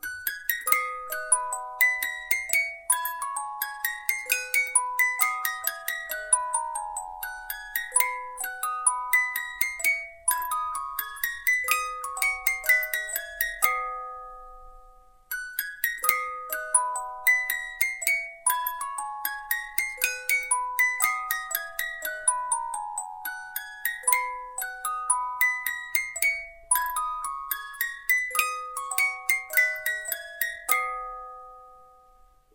hilltop tea musicbox
Two cycles of music box melody. That music box came to me in a decorative Hilltop tea can.
Recorded by Sony Xperia C5305.